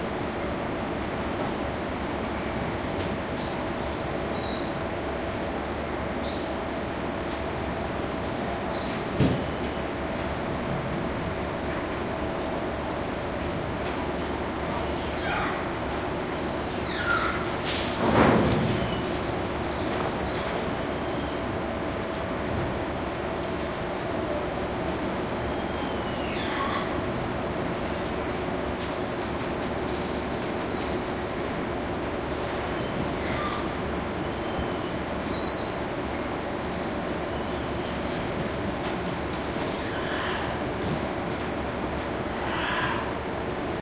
squirrel monkeys02
Squirrel monkeys calling and moving around in their indoor exhibit. Spider monkey screaming in the background. Recorded with a Zoom H2.
field-recording, monkey, primate, rainforest, squeak, squirrel-monkey, zoo